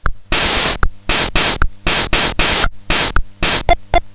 Casio pt-1 "rhumba" drum pattern
80s, casio, drumloop, loop, pt1, retro, rhumba